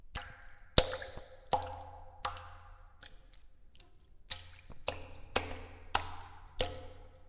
Agua Chapoteo 1
formed by the splashing sound of a hand in the water
puddle, splash, upf-cs13, water